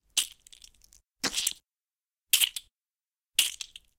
A vampire or vampiress biting a neck.